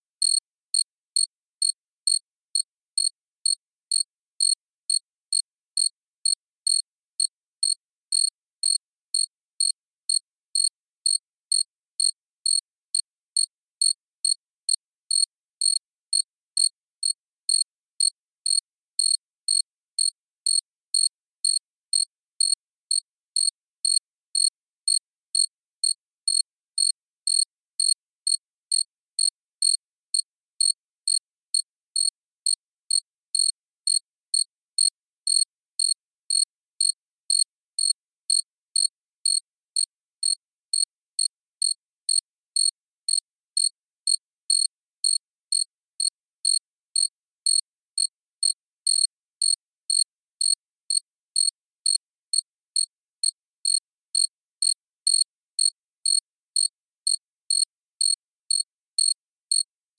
Crickets synthesized using four enveloped sine waves. Envelopes determined by analysis of real cricket sounds fit to Gaussian distributions.